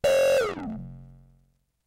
Sound made with the Arturia Minibrute.